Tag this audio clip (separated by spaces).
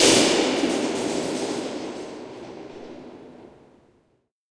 hit percussive field-recording drum percussion metal metallic staub industrial